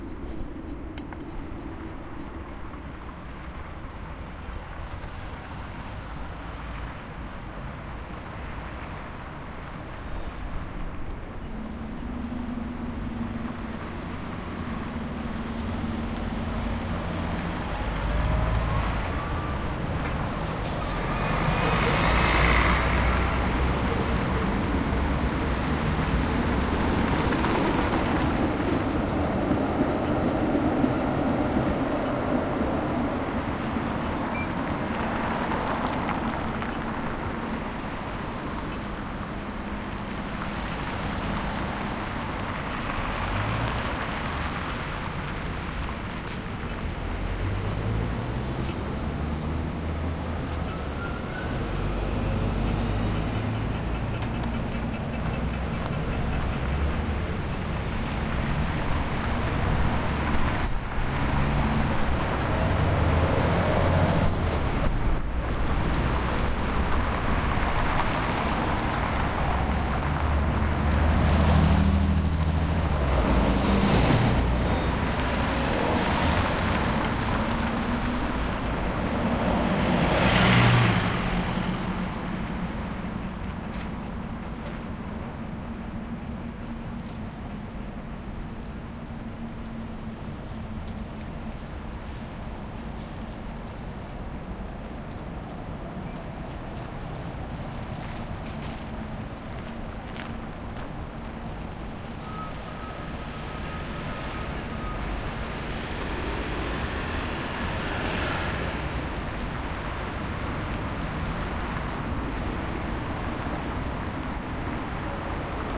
City traffic. Heavy urban traffic.
bus, city-ambience, crossing-beep, heavy-traffic, traffic, tram, trams